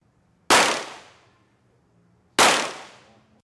Policial dispara dois tiros com revolver calibre 38.